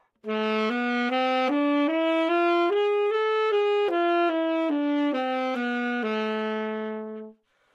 Sax Tenor - A minor
Part of the Good-sounds dataset of monophonic instrumental sounds.
instrument::sax_tenor
note::A
good-sounds-id::6257
mode::harmonic minor
Aminor, good-sounds, neumann-U87, sax, scale, tenor